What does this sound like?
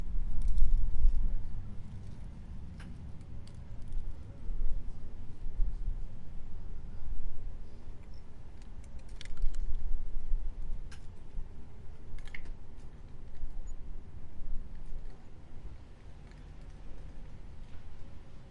Dry leaves and parasol creaks 11
Some nice sounds of very dry-leaves moving and rustling on the pavement.
Recorded with a Zoom H1 on 21 Jul 2016.
breeze, creaking, dry-leaves, garden, outside, rustling, street, wind, Zoom-H1